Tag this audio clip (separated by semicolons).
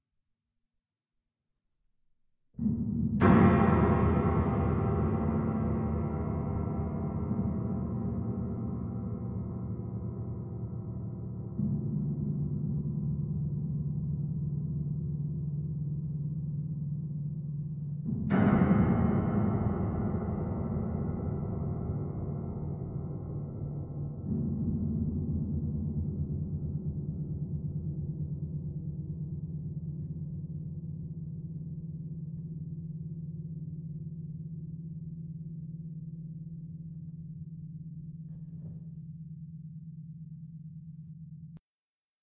horror; field-recording; piano; reverse; effect; terror; special; foley